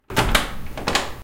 Turning the door knob to a closet.
open, closet, bathroom, front, door, closing, back, opening